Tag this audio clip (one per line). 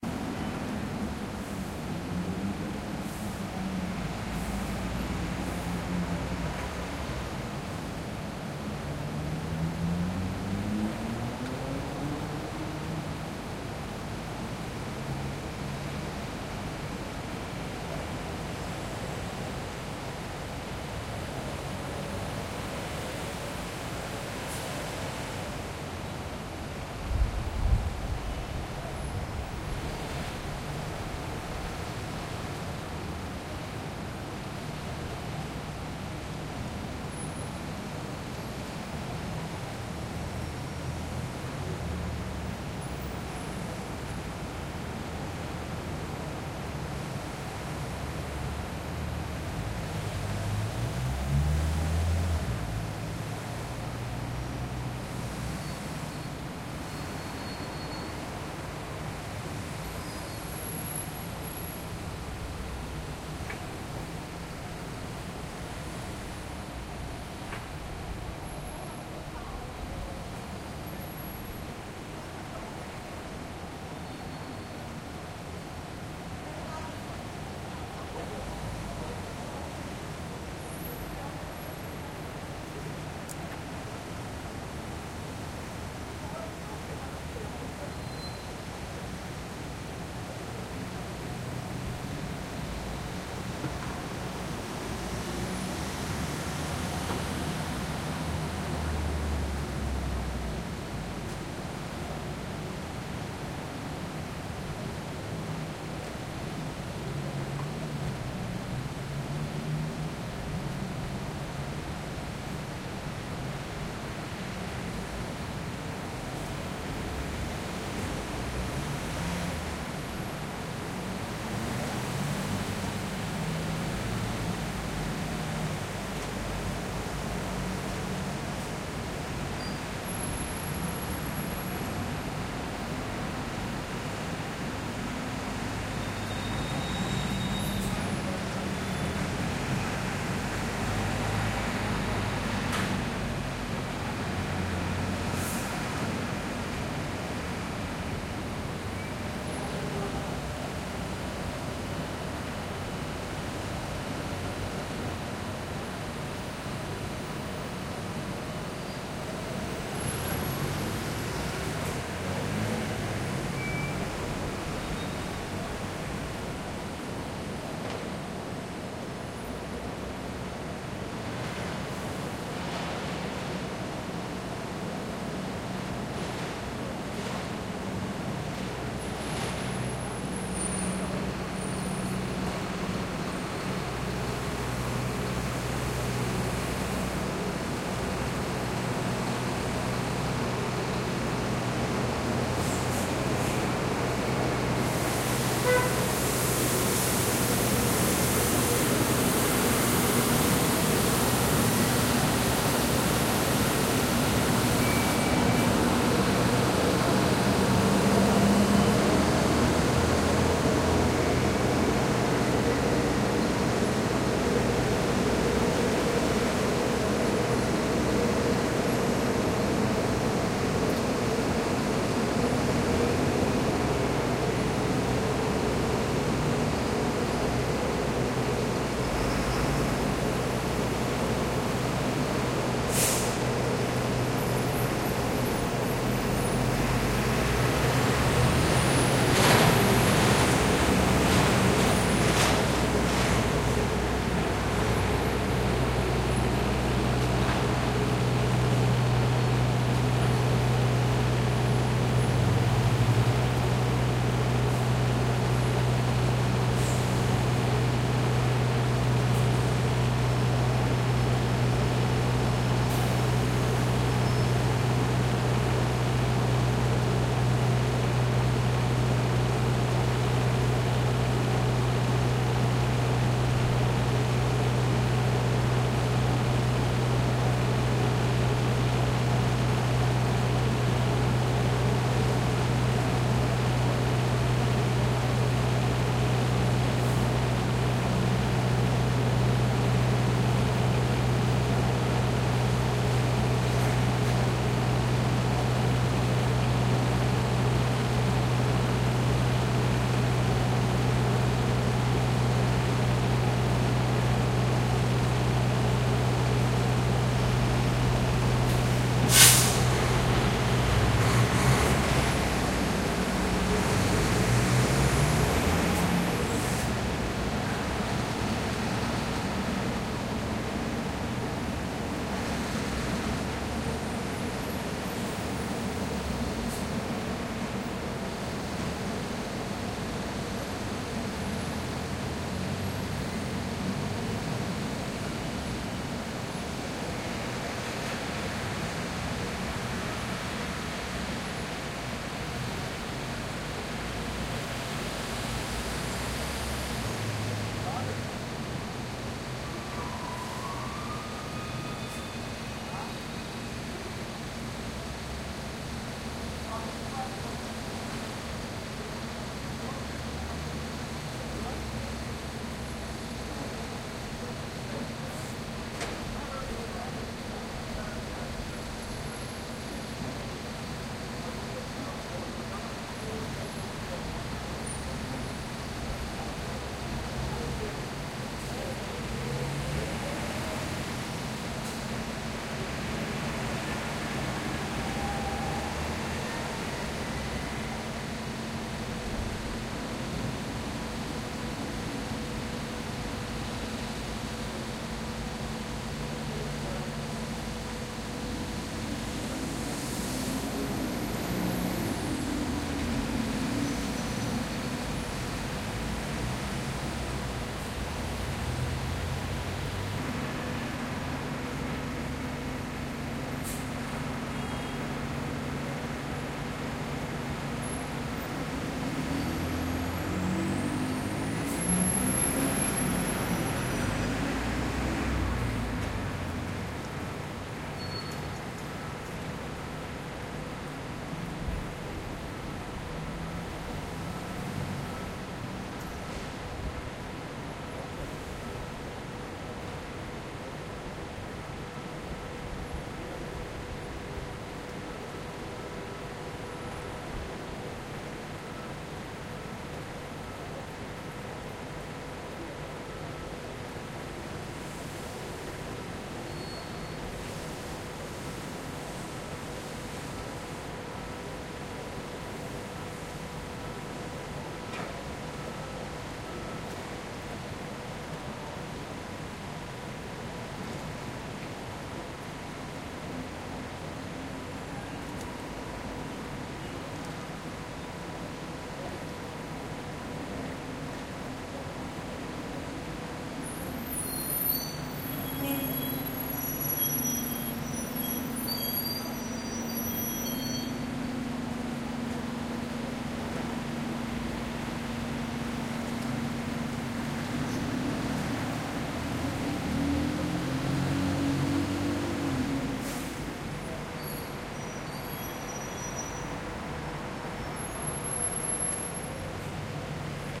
ambiance
ambience
ambient
atmosphere
city
england
field-recording
london
night
nighttime